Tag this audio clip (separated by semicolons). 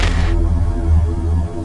atmosphere; baikal; electronic; loop; percussion